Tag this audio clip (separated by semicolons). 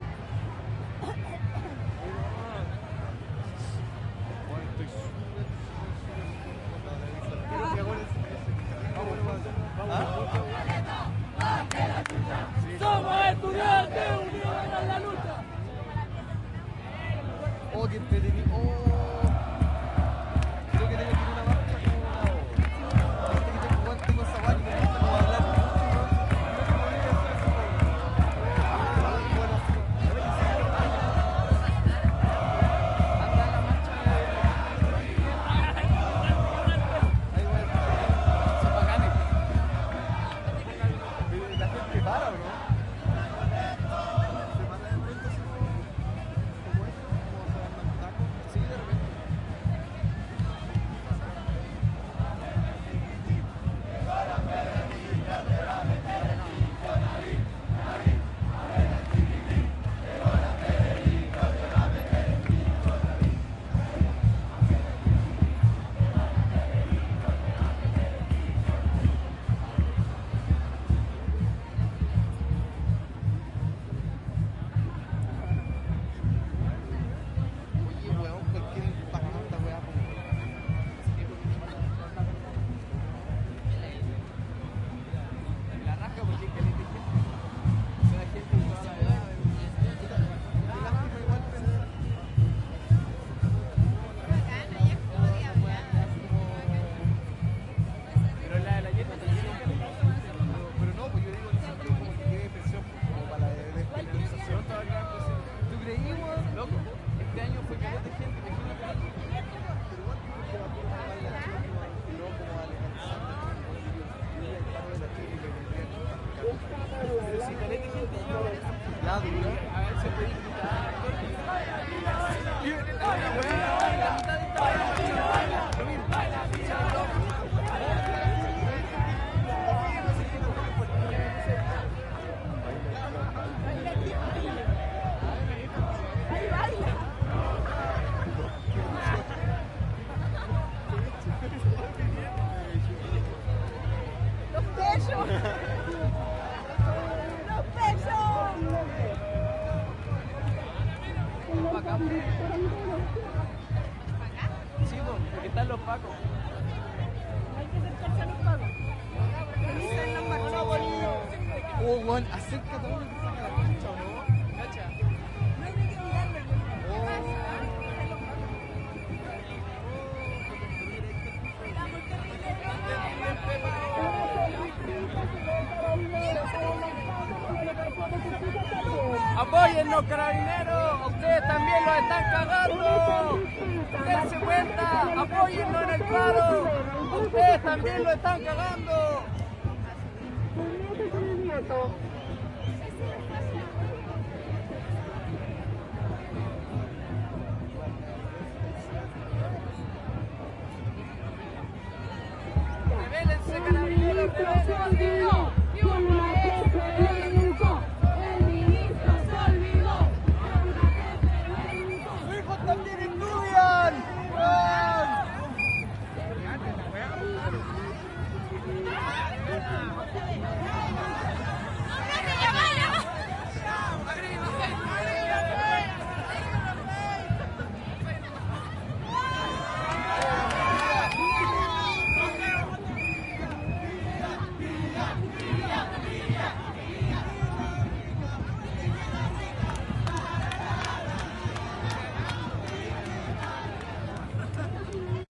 batucadas; carabineros; chile; conversaciones; cops; crowd; dance; de; drums; estudiantes; march; marcha; murmullo; protest; protesta; santiago; sniff; tambores